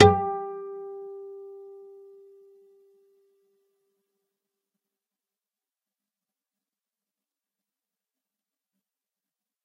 Single note 12th fret E (1st) string natural harmonic. If there are any errors or faults that you can find, please tell me so I can fix it.